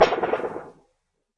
Found a bunch of metal signs from the 70's in a hardware store that said "Fresh country eggs"... thought it was hilarious at the time? Took them home and made noises with them. Recorded direct to PC with unknown mic 1989.